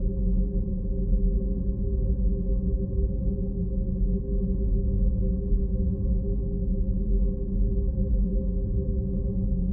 Drone Loop (Fixed)
An ambient drone made and perfectly looped in Audacity. I think it would work well in a horror or sci-fi setting. It sounds like it was made with a synthesizer, but I actually made it from a recording of an old rotary telephone (The bit that spins).
Recorded with a Samsung Galaxy J2 Pro phone, edited in Audacity.
Re-edited again due to further clipping that could occur. The sound now fades out at both ends and should loop.